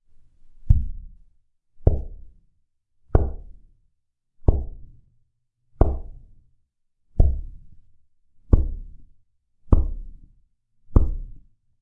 A processed version of headbanging to simulate the sound inside ones head when it is banged on a hard surface.
potential, hard-surface, head, drum, hard-limited, stereo, hitting-head, xy, bang